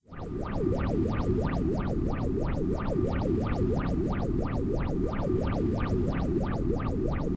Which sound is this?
Sound of UFO floating above the ground. Recorded/edited with audacity.